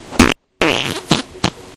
splat fart
car, computer, explosion, fart, flatulation, flatulence, gas, laser, nascar, poot